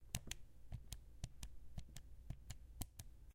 Button Press
pressing a playstation controller button